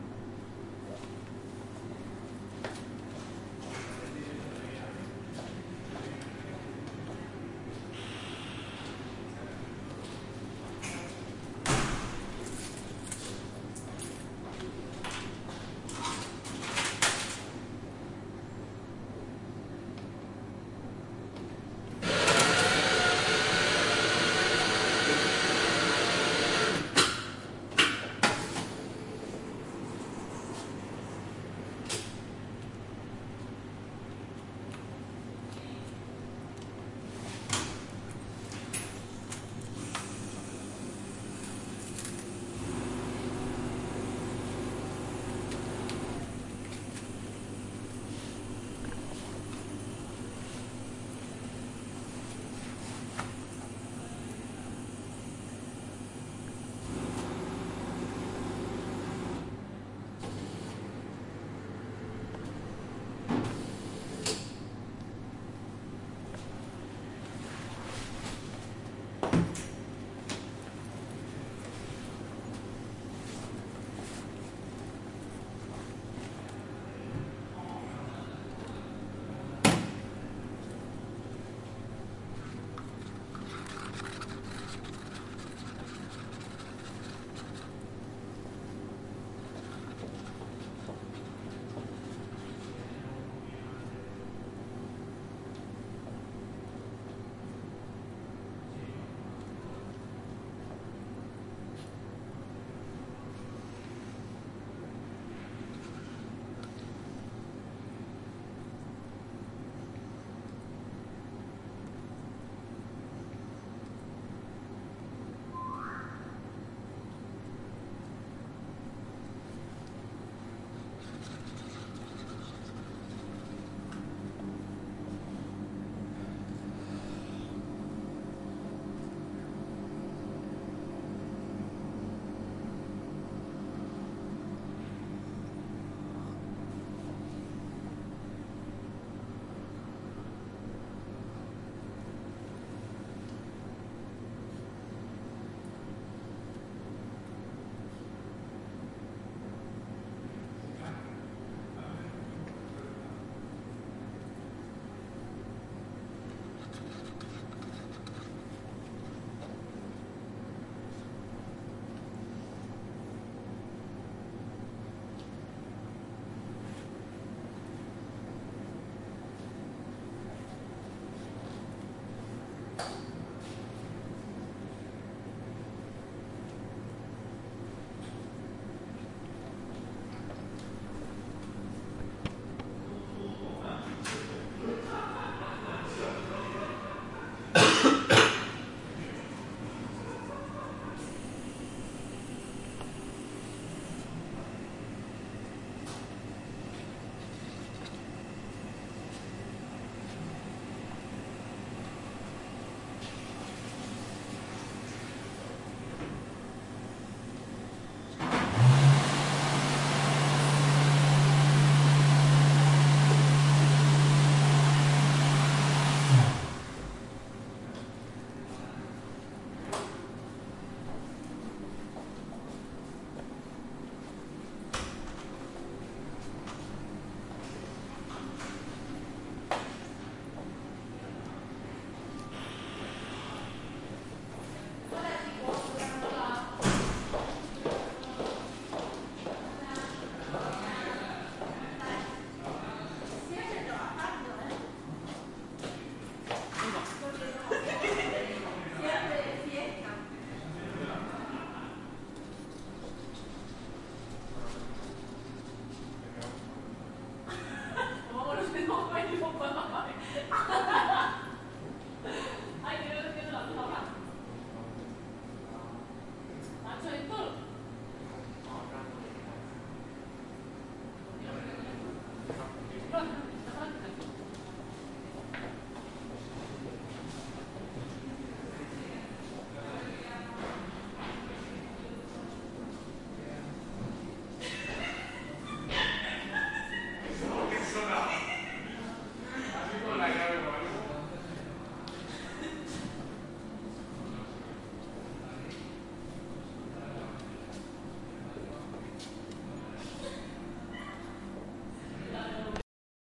Student residence - Hall, near a vending machine
Sounds recorded in the hall of a university residence near a vending machine.
Noises of the vending machine in function, steps, people chatting and laughing, noises of water and hand dryer coming from a bathroom.
Recorded with a Zoom H4n recorder.